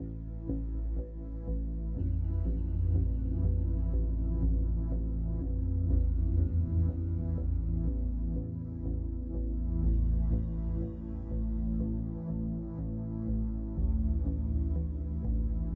atmospheric-loop
atmosphere, dream